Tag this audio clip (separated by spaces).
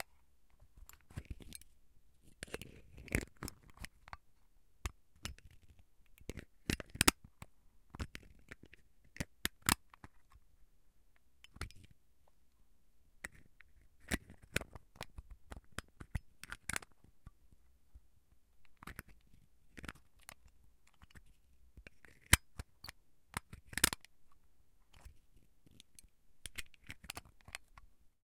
camera k1000 lens lens-cap manual pentax pentax-k1000 photo photography picture slr slr-camera taking-picture